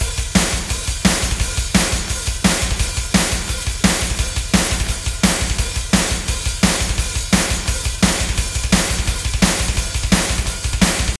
metal drums 5 doubletime
metal drums doubletime